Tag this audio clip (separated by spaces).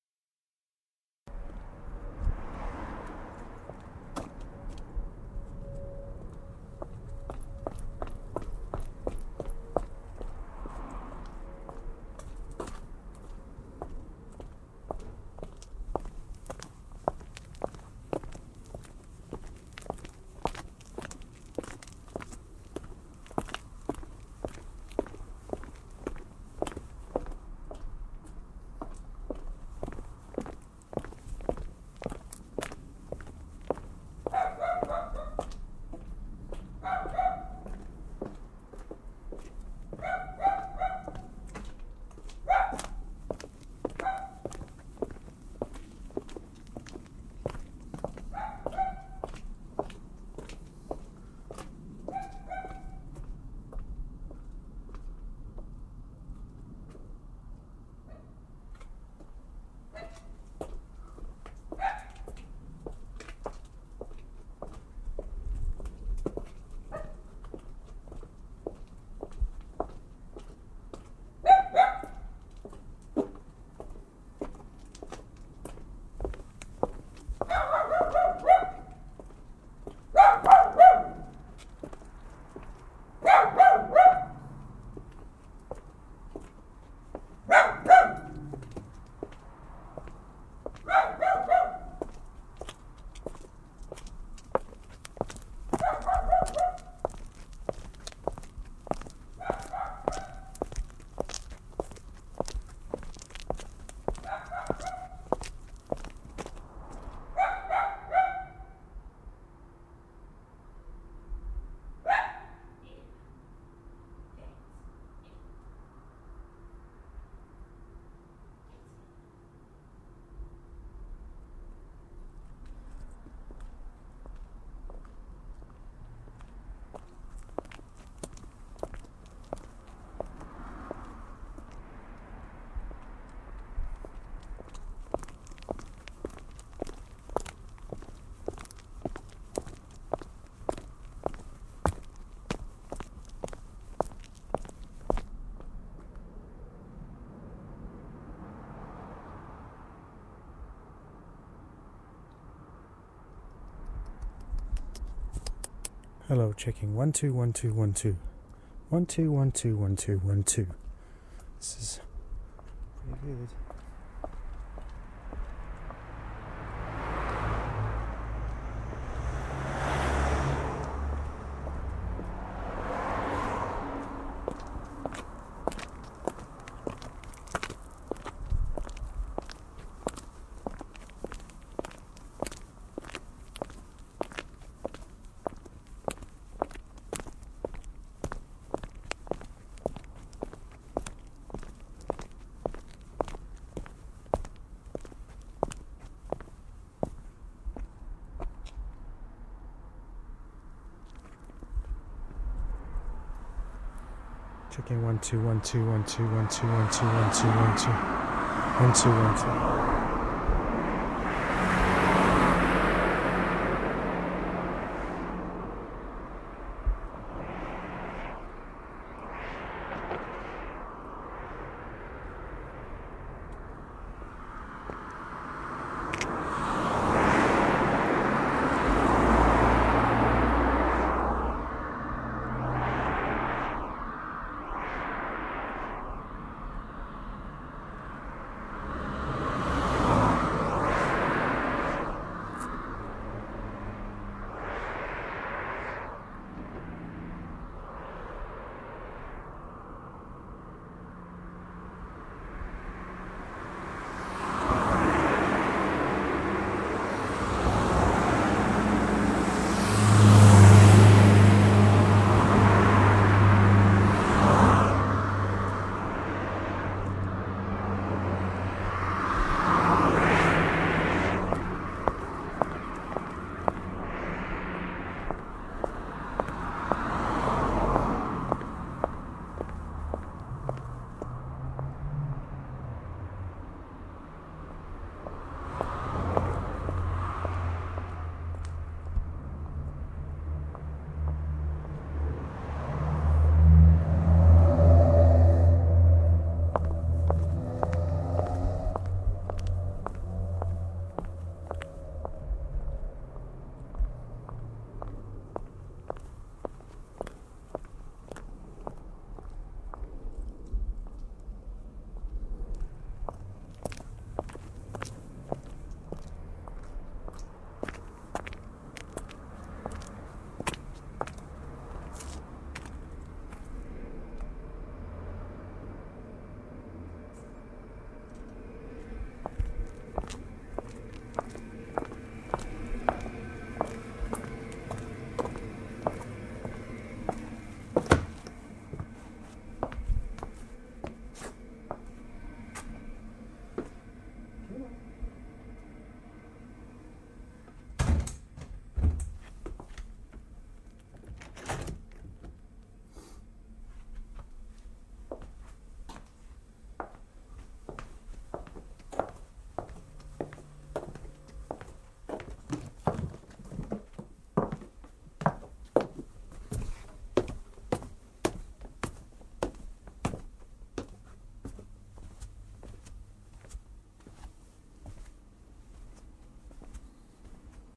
night walking